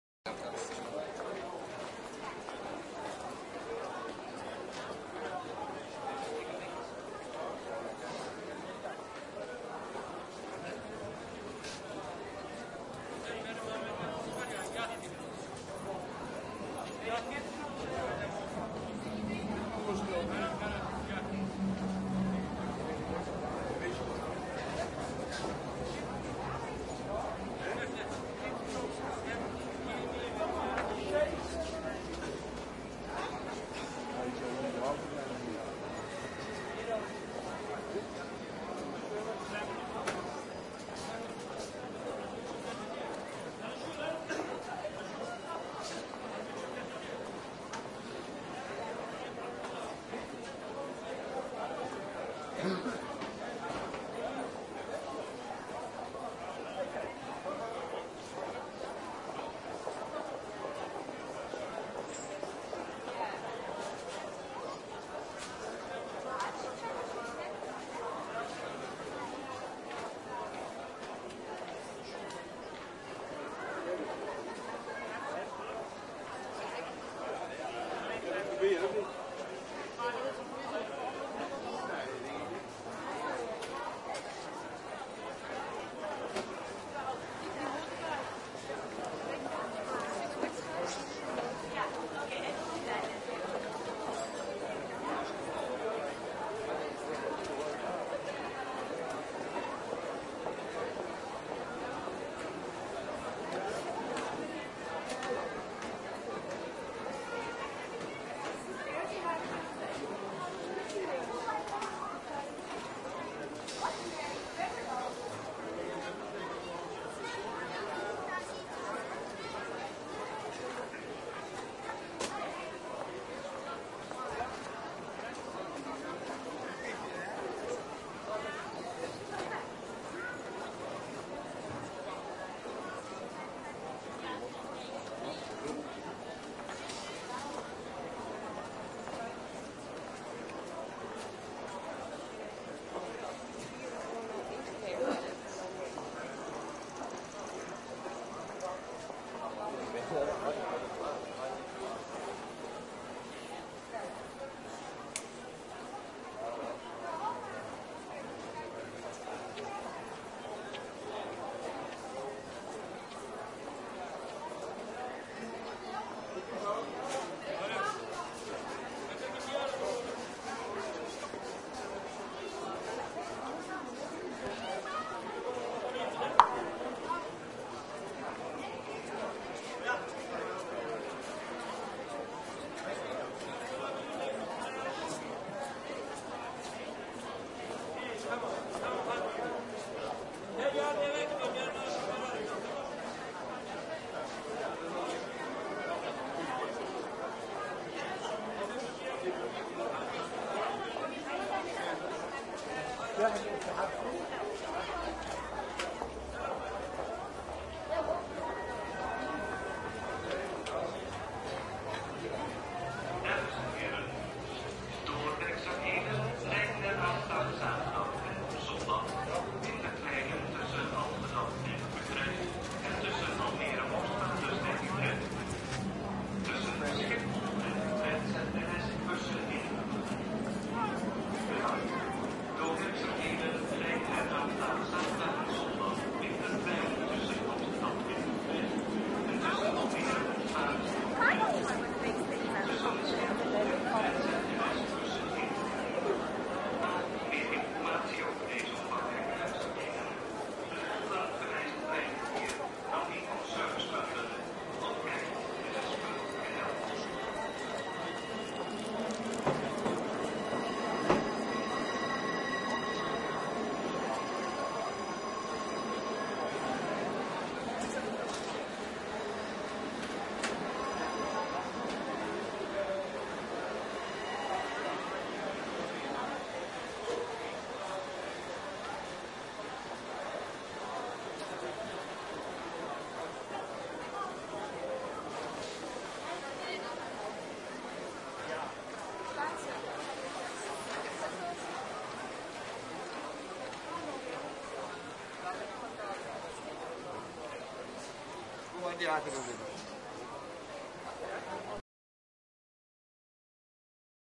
atmo centraal station
announce, atmo, atmosphere, central, crowd, crowded, hall, people, station, train